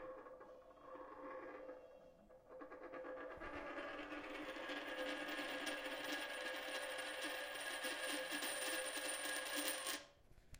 coin spinning and coming to a halt (slight background noise)